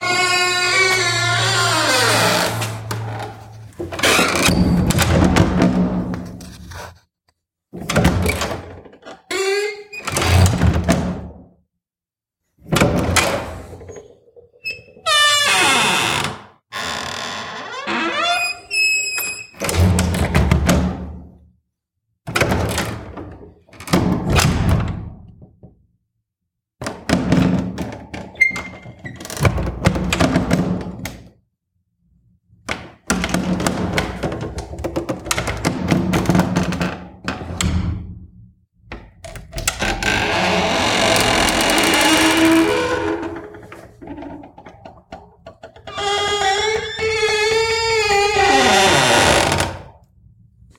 Old Spanish House Doors Open and Close
Large wooden doors opened and closed in old Spanish house near Madrid, recorded with Sony M10. Some room reverb.
scary, sony-m10, handle, creak, wooden, squeak, horror, door, open, old, close